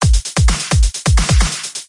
FL Loop 2
Cool loop I created using FL Studio 12. the second in a series. Enjoy!